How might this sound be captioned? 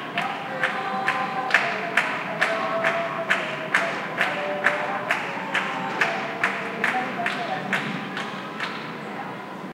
in the heat of night (air conditioners noise can be heard) people cheer the victory of the 2008 European Football Cup by Spain, on June 29th
cheering, clapping, field-recording, football, voice